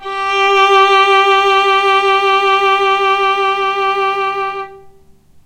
violin arco vib G3
violin arco vibrato
violin, arco, vibrato